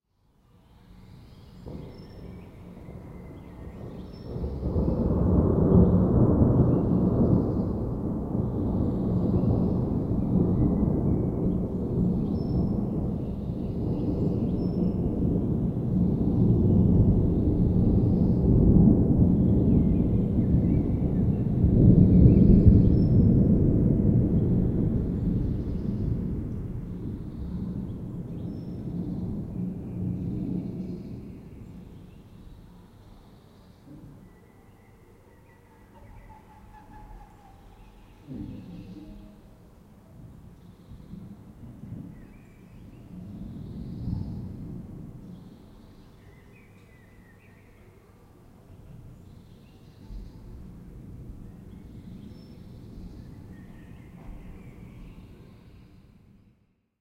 Spring Distant thunderstorm suburban birds wind single rumble - medium
Springtime thunderstorm recorded using a shotgun mic pointing out of a window. Mono recording but with a bit of stereo artificial reverb added.